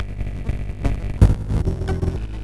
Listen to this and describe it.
04 coil loop
ambient, coil, drum, loop